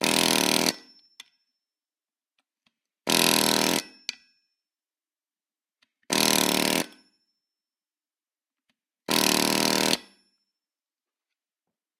Pneumatic hammer - Deprag zn231 - Forging 4
Deprag zn231 pneumatic hammer forging red hot iron in four strokes.
4bar, 80bpm, air-pressure, blacksmith, blunt, crafts, deprag, forging, hammer, impact, labor, metal-on-metal, metalwork, motor, pneumatic, pneumatic-tools, tools, work